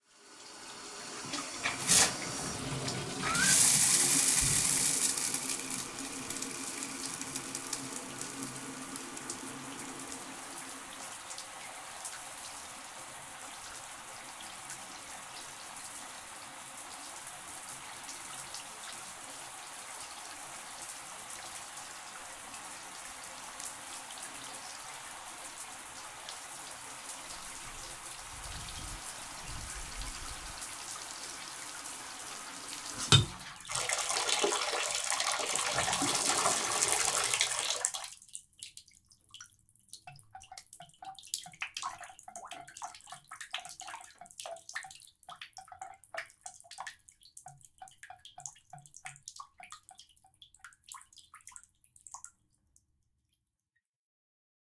Shower Turn On Run Turn Off Drain Water
Shower turning on, running and turning off, water drips and drains.
bath, bathroom, drain, drip, dripping, faucet, Free, plumbing, restroom, run, running, shower, Sound, water, water-closet, WC